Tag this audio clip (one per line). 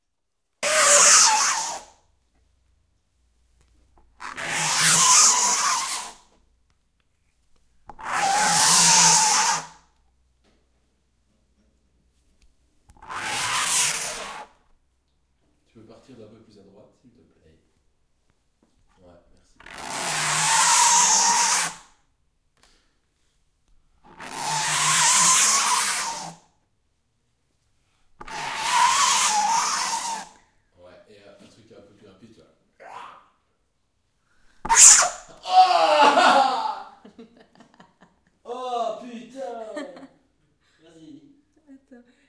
shiver
nails